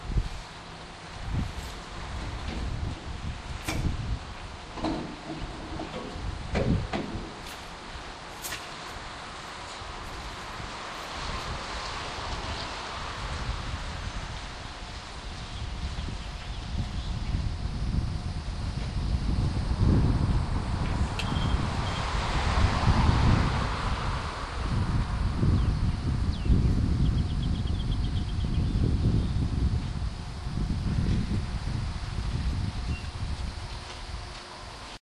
southcarolina exit102santee windy
Windy recording of me getting gas next to Santee Resort Inn recorded with DS-40 and edited in Wavosaur.